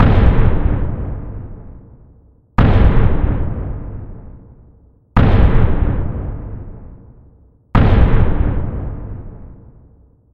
A large amount of reverb.